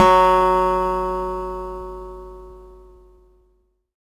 Sampling of my electro acoustic guitar Sherwood SH887 three octaves and five velocity levels
guitar, acoustic, multisample